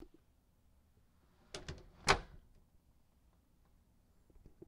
a door closes
close, door, closing